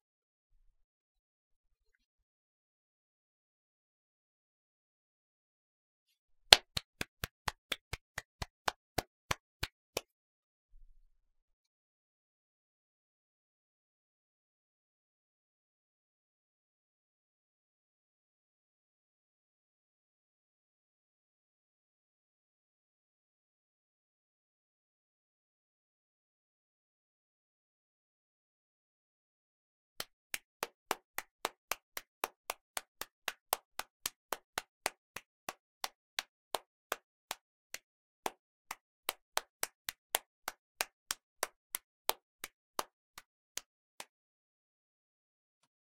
Clapping done by a single person, with the intention of merging all the pieces together and having a full applause.
Recording by Víctor González
clap, applause, indoors, hands, single, clapping